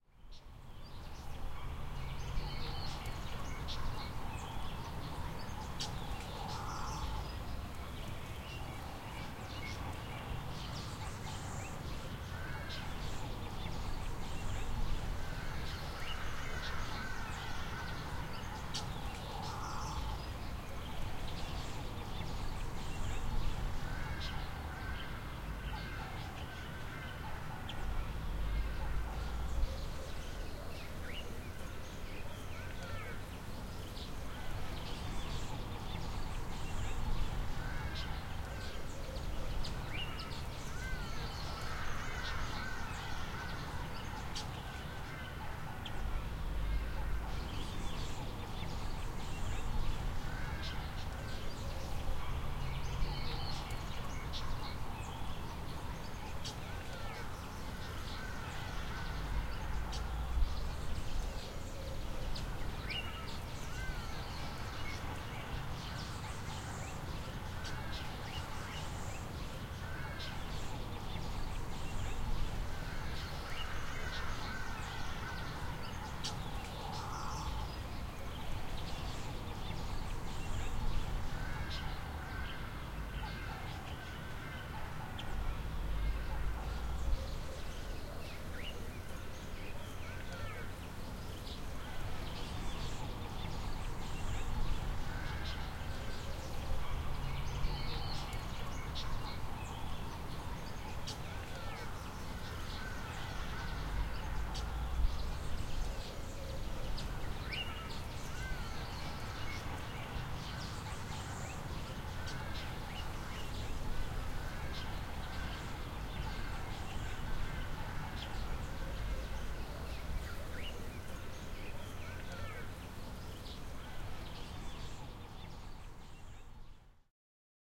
Park ambience: Various birds chirping, pigeon sounds, hadeda ibises calls, grass and leaves rustling.
Johannesburg, field-recording, South-Africa, birds, hadada, Glenhazel